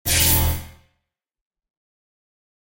I used FL Studio 11 to create this effect, I filter the sound with Gross Beat plugins.
electric, freaky, sound-design, lo-fi, digital, fxs, future, computer, robotic, sound-effect, fx